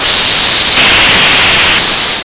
Hissing static noise
A static noise as if a signal is cutting off very badly.
Created using BFXR.
8-bit, abstract, arcade, computer, digital, distorted, electric, electronic, error, glitch, harsh, lo-fi, noise, radio, retro, sci-fi, signal, sound-design, static, video-game, videogame